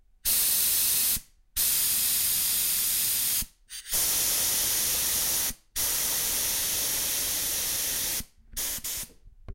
02-2 Compressor Using
Panska, compressor-using, CZ, Czech